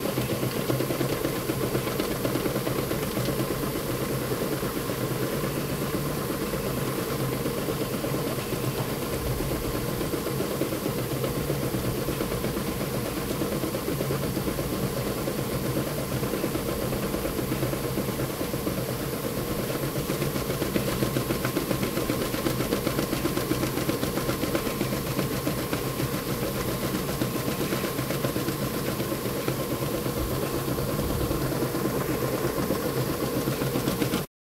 Recorded with Zoom N2 in a Post Service sorting facility. Letter sorting machine.